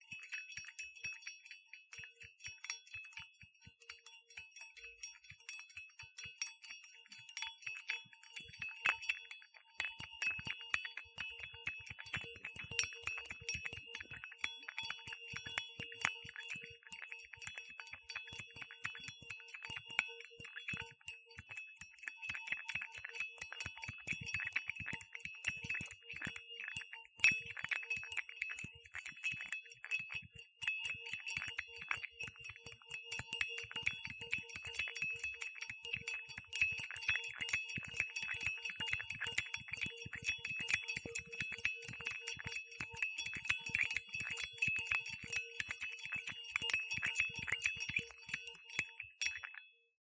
2022-01-14-tongue-held-bell-2
Holding a metal bell by its tongue, I’m rhytmically shaking it to make this sound. Reminds sleigh bells a bit. Sound of a couple of links joining the tongue to the bell body is prominent.
Recorded with Redmi Note 5 phone, denoised and filtered bogus sub bass in Audacity.
metal-bell, small-bell, jingling, sleigh, bell, rhythmic